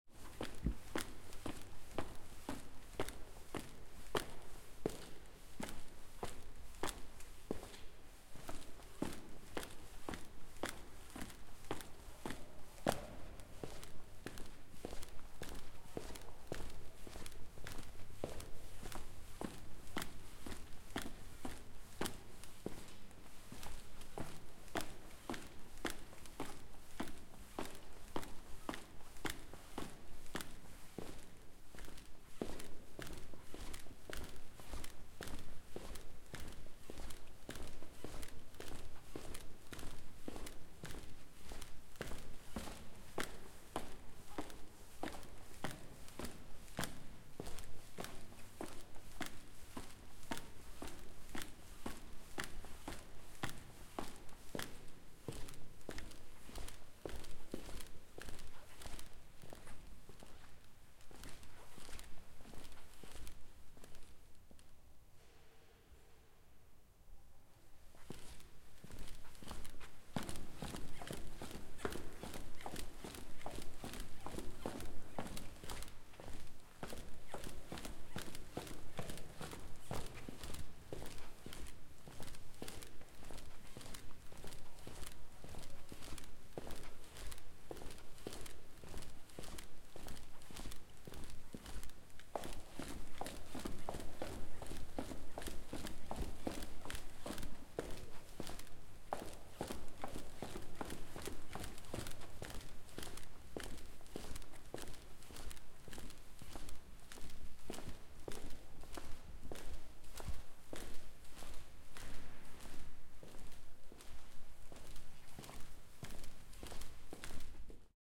Walking Up Down Stairs Close
Walking up and down (with a micro) (tascamDR40) on a marble stairs in a large hall. big reverb rubber shoes.
Always open for feedback, always trying to learn.
fabric, feet, floor, foot, footsteps, hall, marble, rubber, shoes, stair, stairs, stairway, steps, walk, walking